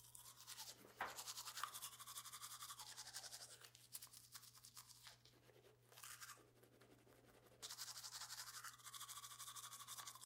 Brushing Teeth
Teeth, Toothbrush, Brushing